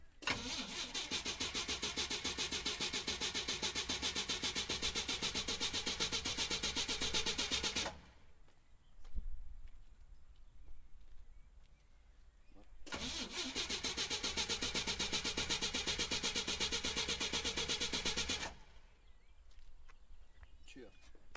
failed car start
old engine failing to start up
It helps this community a lot :)
car, engine, failed, old, start